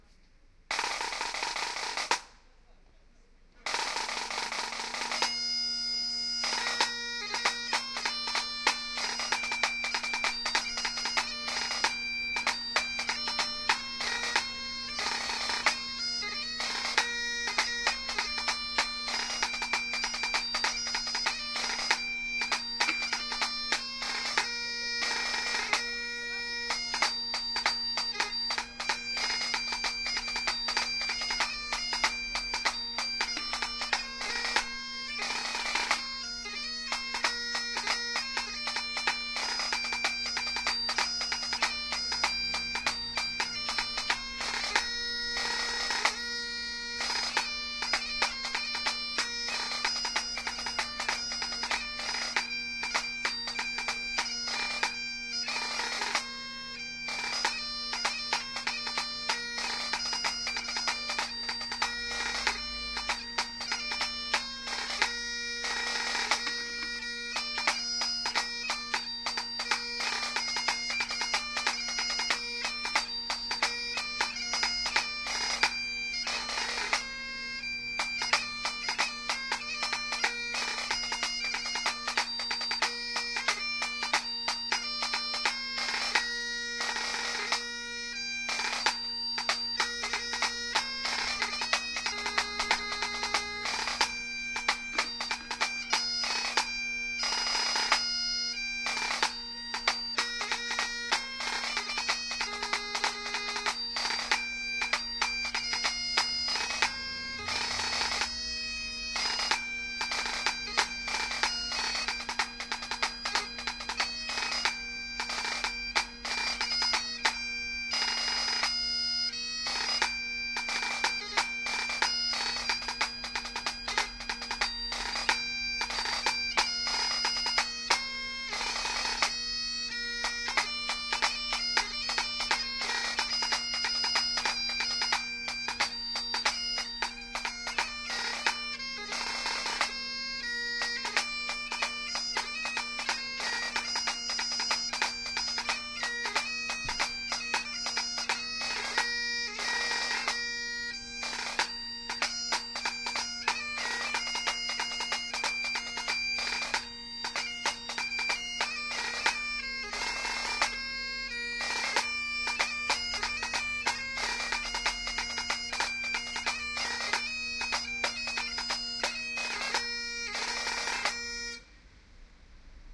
Pipe and Drum
You can hear two members of the Vale of Atholl Junior Pipeband. They played this tune in Pitlochry in October 2008, after I asked them for permission to record it. Thanks again.
AEVOX binaural microphones into iriver ihp-120.